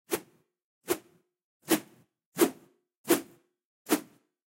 A couple of bamboo swings recorded with AT2020 through an Audient iD4 interface.